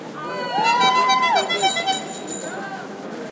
20081118.classic.horn
people shouts + a bicycle horn. Shure WL183 pair, Fel preamp, Edirol R09
voice, shouting, bicycle, horn, field-recording